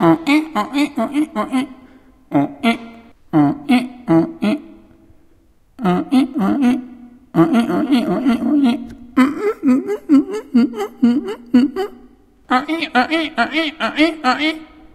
Making squeaky sounds with my mouth.
Recorded with Zoom H2. Edited with Audacity.